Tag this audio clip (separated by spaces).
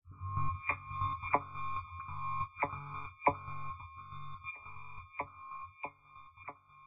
ambient background d dark dee-m drastic ey glitch harsh idm m noise pressy processed soundscape virtual